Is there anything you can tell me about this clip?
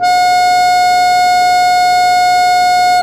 single notes from the cheap plastic wind organ
c3, note, organ, single, wind